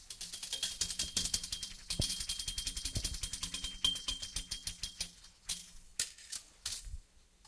Brush on metal ringing object
brush, taps, random, thumps, objects, hits, variable, scrapes